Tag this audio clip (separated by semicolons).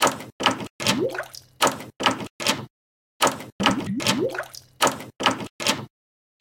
4bars; loopable; bubble; 150bpm; seamless-loop; simple; rhythm; door; loop; Continuum-4; door-handle; rhythmic; bubbling; Joana